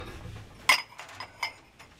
tasas chocando 3
things, bar, coffe, shop